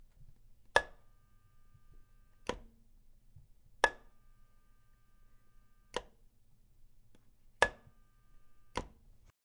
flicking light on and of
flipping switch on and off
flicking, switch, light